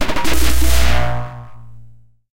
Some Djembe samples distorted
distorted, perc, distortion, experimental, dark, drone, noise, sfx